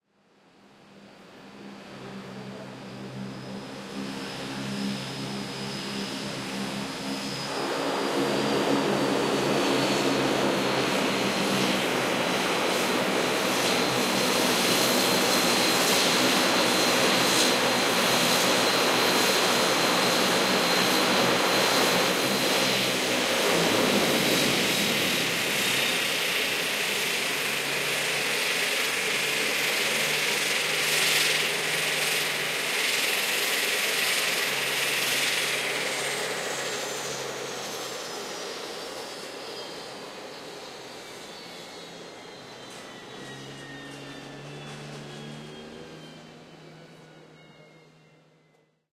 dash turboprop plane turning off motor
Dash 8 Turboprob stops its engines after landing at Innsbruck Airport in July 2019. Recorded with Sony PCM D100.
aircraft, airport, d100, dash, motor, plane, stop-engines